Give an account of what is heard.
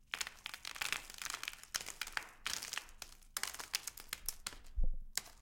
breaking up celery 1-2
breaking, celery, multiple, times